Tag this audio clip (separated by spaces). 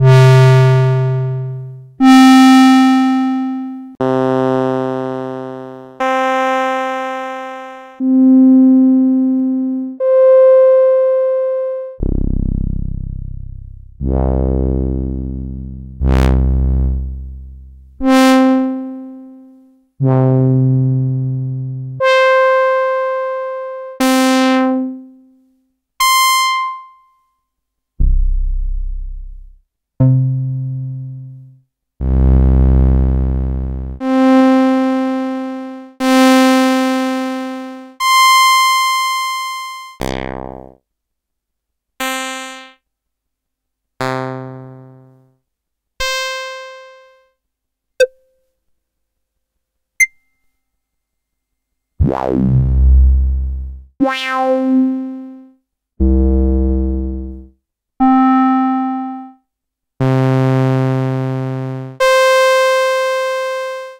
bassdrum electronica fragment oneshot SAMPLE singlehit synthesized synthesizer YAMAHA